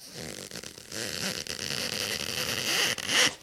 Creepy Shoe Sound
shoe, creepy